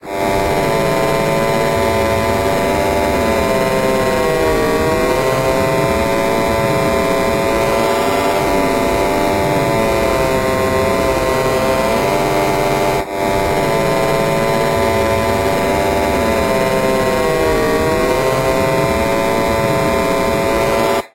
Radio MidRange
Part of a game jam I'm doing with friends. radio of the main character in its "mid distance" state when enemy is not very far. Made from scratch with Dimension pro.
fm, FX, idle, interference, noise, radio, static